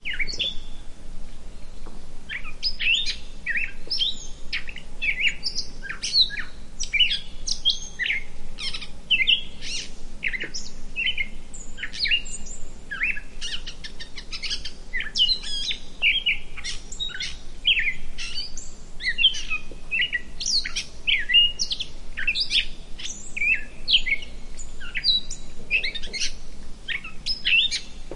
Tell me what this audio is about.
A morning birdsong.